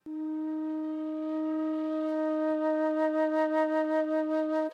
Studio recording Single flute note with vibrato recorded with Neumann KM 140